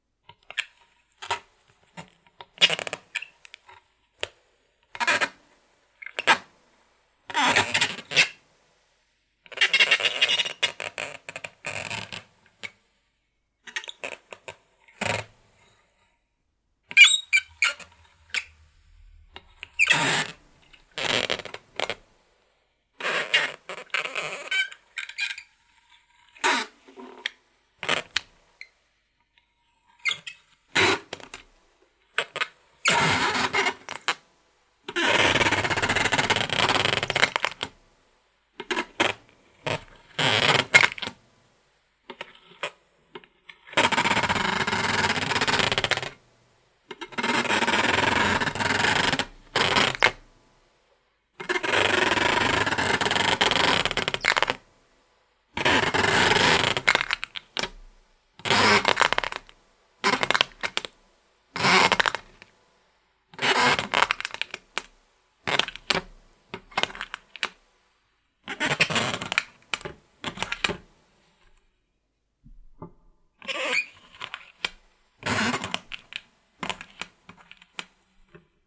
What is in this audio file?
plastic scraped on glass
A plastic/paper wrapping for tuna fish scraped against a glass plate. Some nice squeaking and creaking and some clicks. Some passages were cut out and some noise reduction done in Adobe Audition.
creaking, effect, experimental, glass, plastic, scraping, squeaking